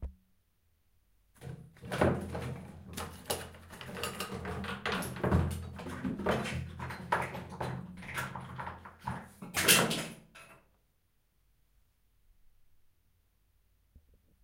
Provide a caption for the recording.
Nervously Searching for the Antidote SFX
drawer, dropping, glass, items, medicine, nervous, plastic, searching